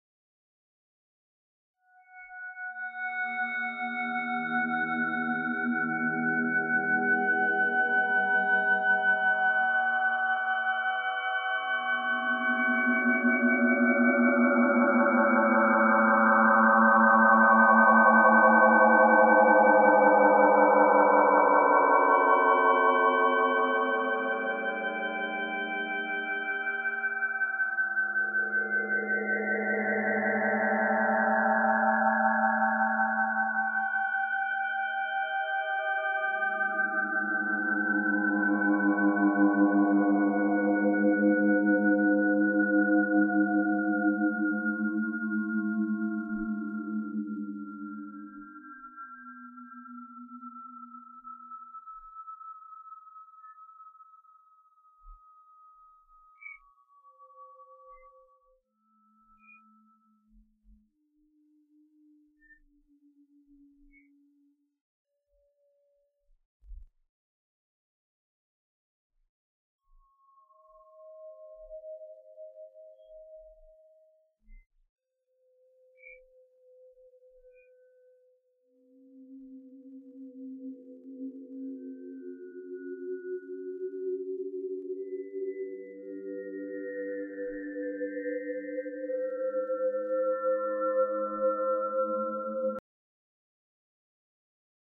JK Pallas
Musical exploration, ambient textures.
ambient, texture